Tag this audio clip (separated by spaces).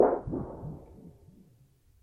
bang metal boing